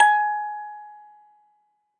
metal cracktoy crank-toy toy childs-toy musicbox